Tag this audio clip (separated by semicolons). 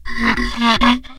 friction; idiophone; wood; instrument